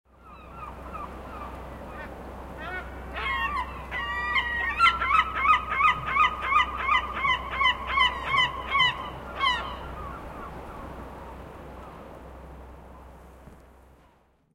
Seagulls short
Seagull, sound, field-recording, bird, animal